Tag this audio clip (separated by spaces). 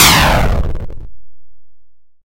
8bit gunshot